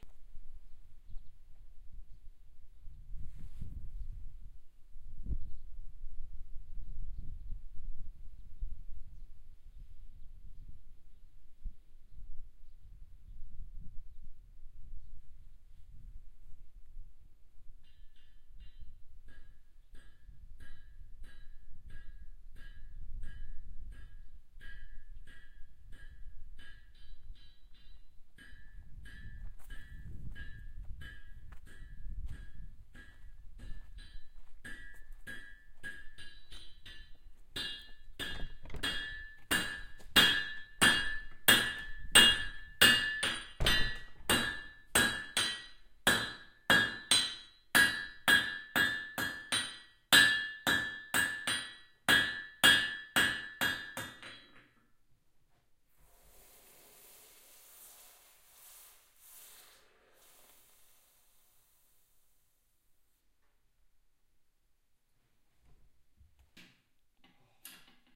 Schmiede reinkommen
Walking from street into an old smithy
3d-recording, anvil, binaural, country, country-life, countryside, field-recording, smithy, village